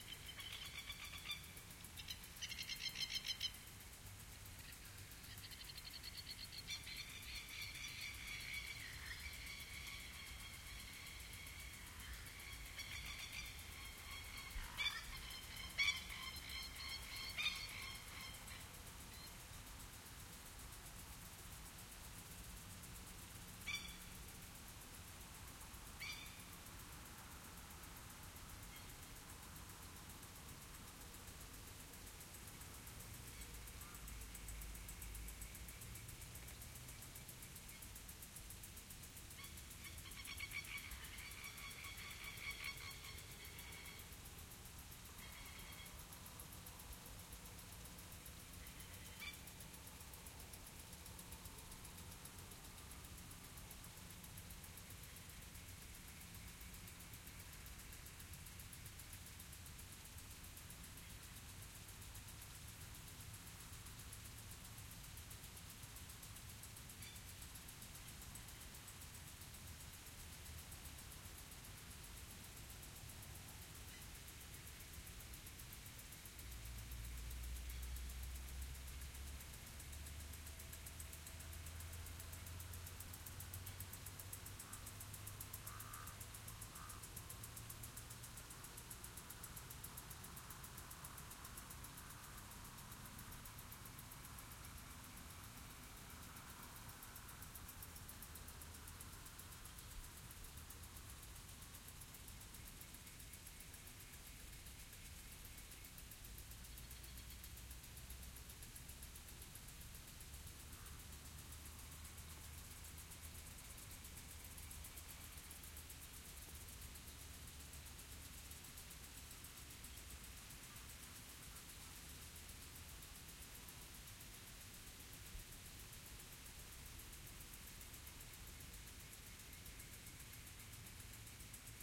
Suburban atmos. Wind through trees, bird calls and sprinklers in the distance